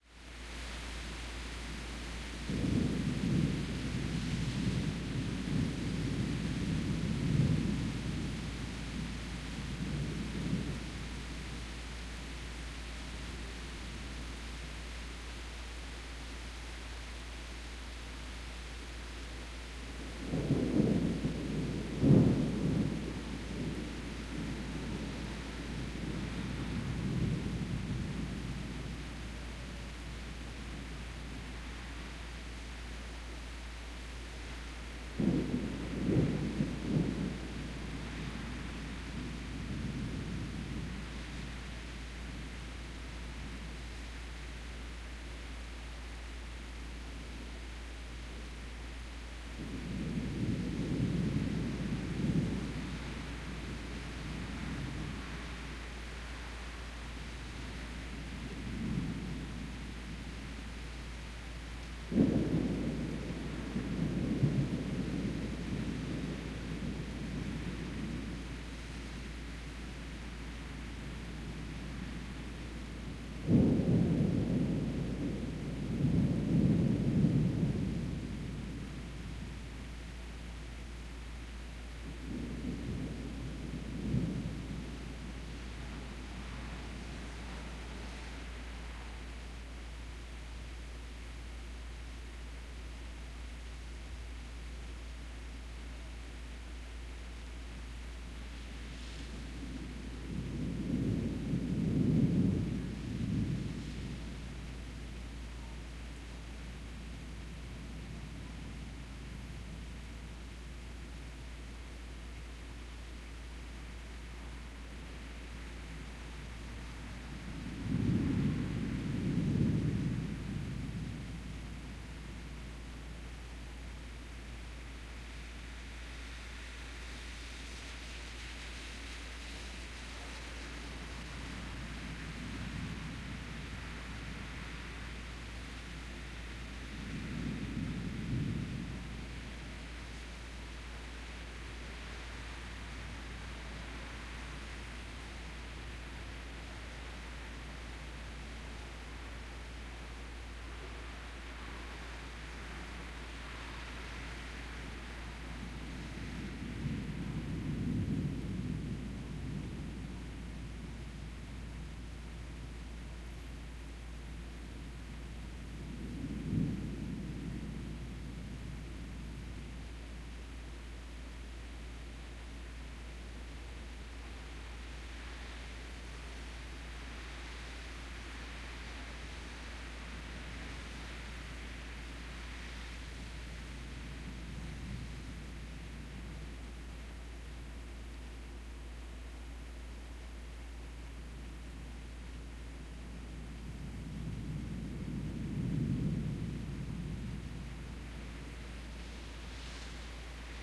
Thunder-1989-b (rumbles)
Far off rumbles of a thunderstorm
3:35 - Recorded Spring of 1989 - Danbury CT - EV635 to Tascam Portastudio.